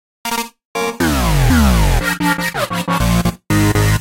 Bass/lead/synth combination
bass, dubstep, dupstep, lead, melody, sequence, synth